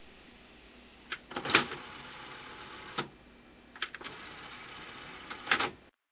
dvd player at home
Opening and closing a DVD player in my bedroom
dare-12, DVD, player